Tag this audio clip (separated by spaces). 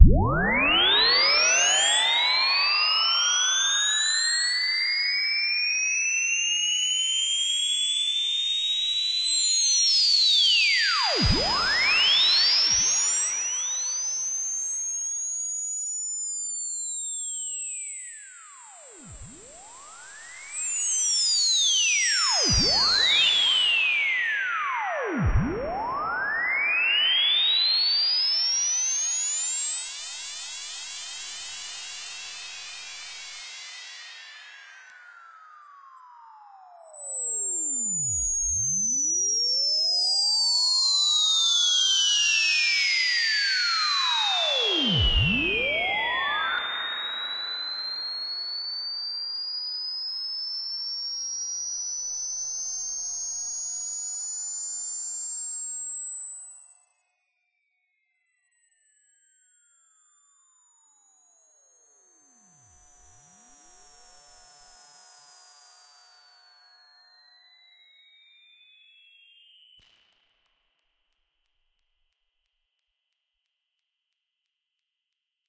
sample production music shot synth single short piano Smooth electronica one effect effects